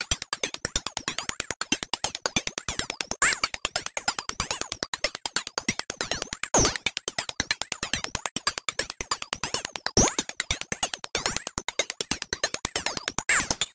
Ethnic modulation loop 8 bars 140 bpm
A nice ethnic percussion loop with alot of modulation.